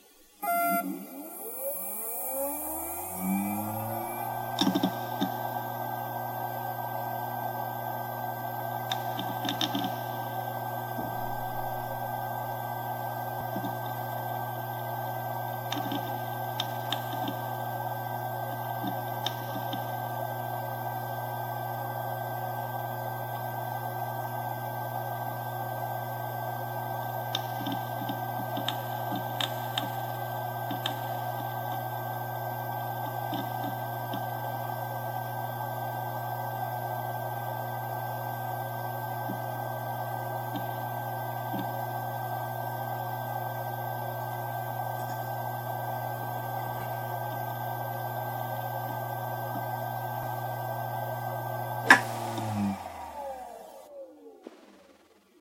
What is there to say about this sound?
Maxtor DiamondMax 10 - 7200rpm - FDB
A Maxtor hard drive manufactured in 2005 close up; spin up, writing, spin down. (maxtor 6b160m0)
hdd, drive, motor, disk, machine, hard, rattle